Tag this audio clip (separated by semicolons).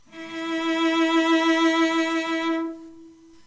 instrument; scale; violoncello